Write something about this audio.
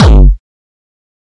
Distorted kick created with F.L. Studio. Blood Overdrive, Parametric EQ, Stereo enhancer, and EQUO effects were used.
beat, synth, drumloop, progression, kickdrum, distortion, trance, melody, kick, hard, techno, distorted, drum